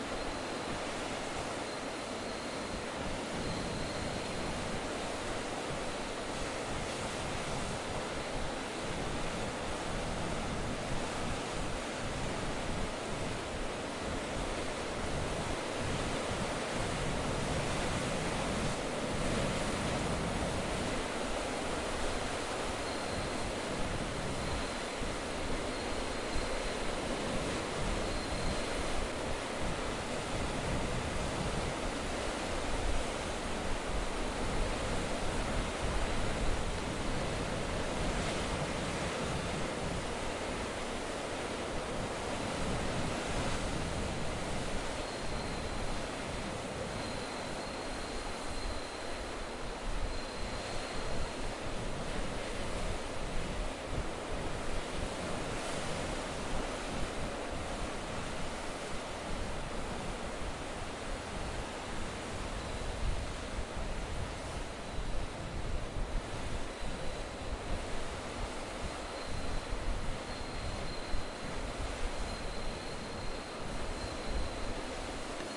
OCEAN FAR
Distant perspective of ocean waves breaking on the beach with crickets in B/G. Some wind noise.
waves, crickets, ocean, beach, surf